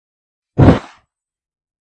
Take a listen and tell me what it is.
I present to you, another thud sound.
fall, fight, punch, thud